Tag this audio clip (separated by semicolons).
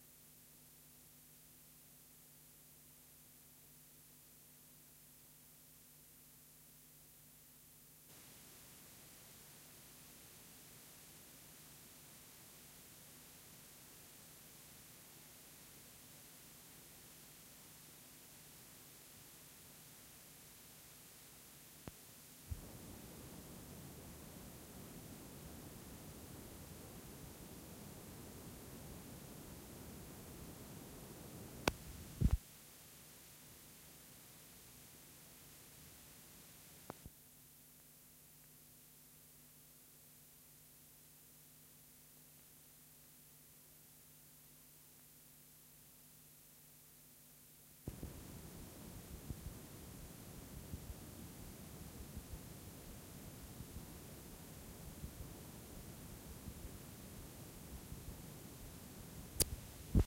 hiss; reduction; tape; Sony; noise; recording